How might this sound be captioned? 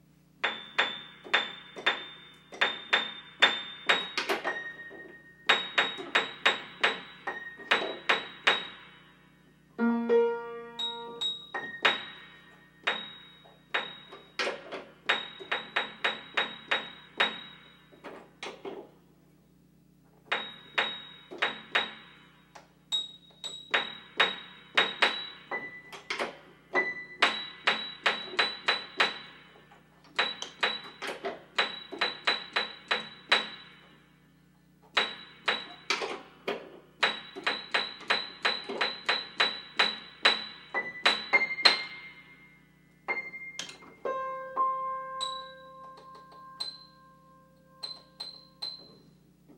Piano tuning, highest notes, plink sound.